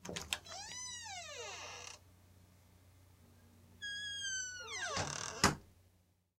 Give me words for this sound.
011 Door opens and shuts
door opens, hinge creeks, door closes. Recorded with a simple shotgun microphone - Mackie Mixer - audigy sound card - SoundForge 7. I was going to use this for a play in Dallas - sfx wasn't needed.
soundeffect, door